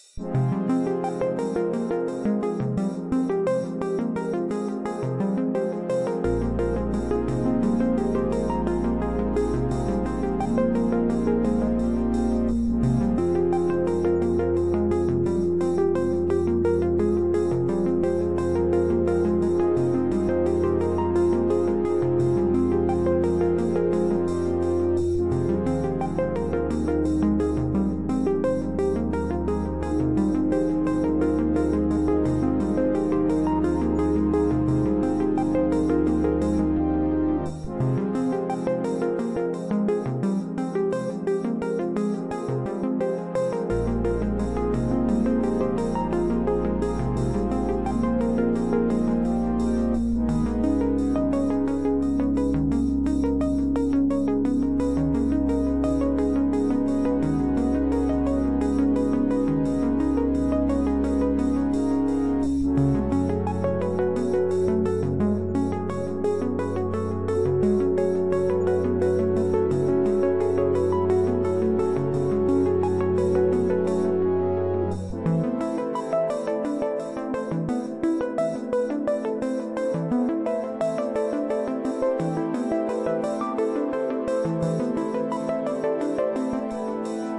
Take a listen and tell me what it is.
background arpeggiator e-music experimental fragment.